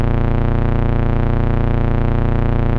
A basic saw waveform from my Micromoog with full two octave doubling applied. Set the root note to A#2 -16 in your favorite sampler.